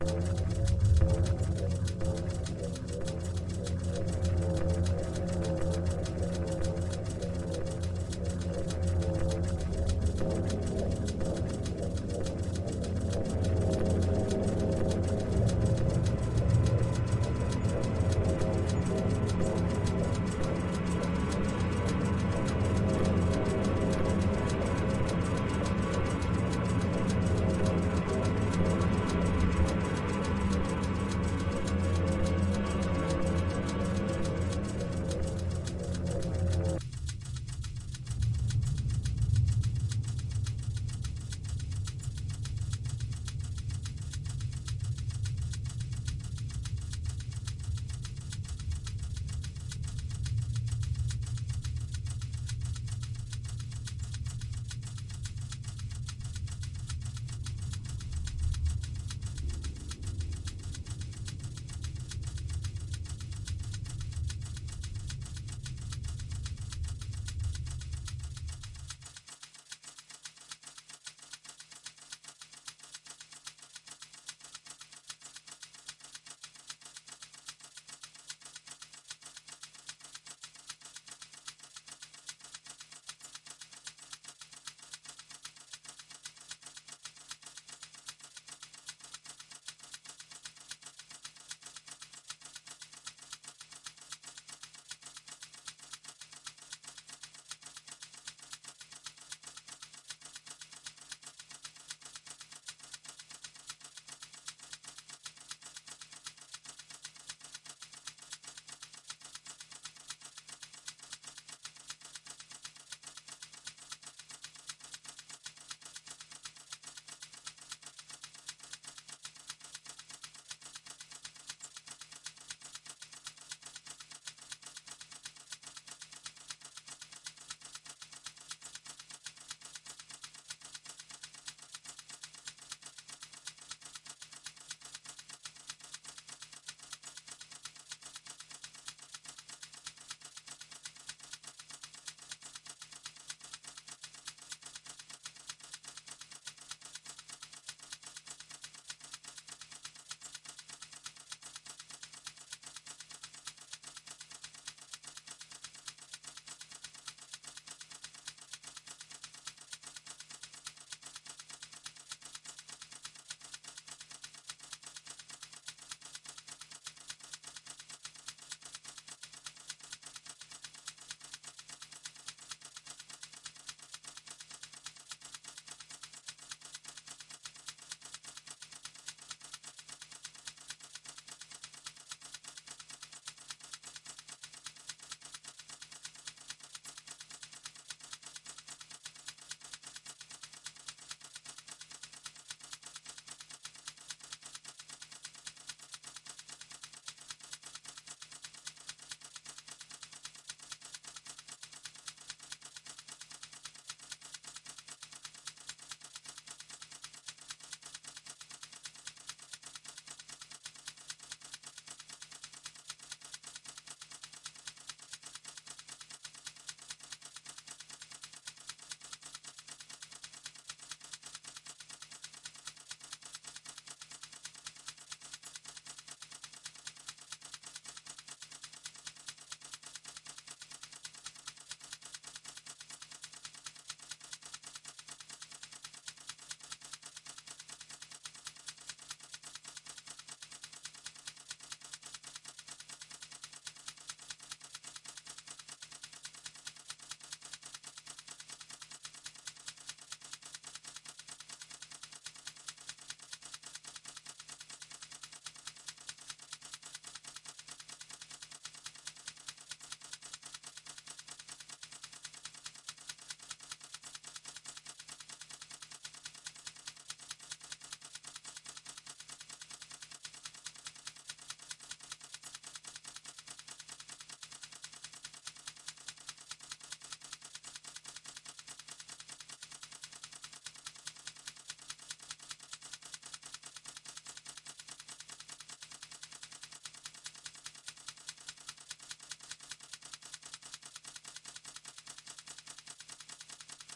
A typewriter rythym with a strange drone in it